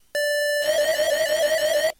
sample of gameboy with 32mb card and i kimu software
boy, game, layer